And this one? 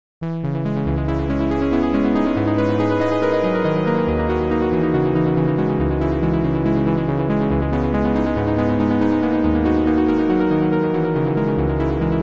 Absolute Synth
A few chords put together using an arppegiator and change in velocity.
synth, electronic, chords, trance, delay, arppegiator, techno, velocity